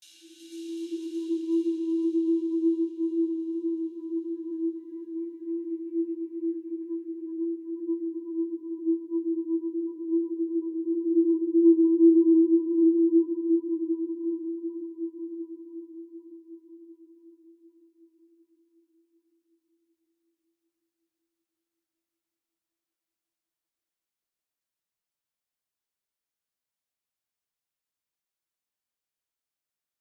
eery ambience
downtempo background ambiance for suspense